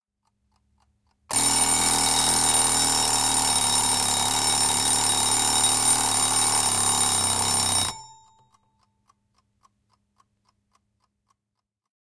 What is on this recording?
Herätyskello, pirisevä / Alarm clock, mechanical, ringing alarm, a close sound (Junghans)
Herätyskello soi, pirisee, lähiääni. (Junghans).
Paikka/Place: Suomi / Finland / Nummela
Aika/Date: 01.01.1992
Alarm-clock, Clock, Field-recording, Finland, Finnish-Broadcasting-Company, Kello, Mechanical, Mekaaninen, Rimging, Ring, Soida, Soitto, Soundfx, Suomi, Tehosteet, Yle, Yleisradio